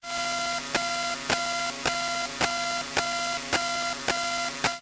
CBC electromagnetic sound 1
This is a sound recorded with a handcrafted electromagnetic microphone. The machine recorded is used for computation tasks at CBC (UPF) for their experiments on brain cognition.
brain, electromagnetic, cognition, upf, noisy, experiment, machine, cbc